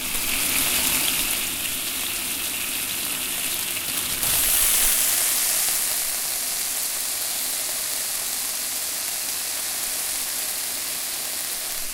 food being added to a hot wok!